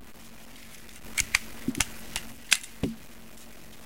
gun reload-B
A gun-reloading sound.
cock, gun, reload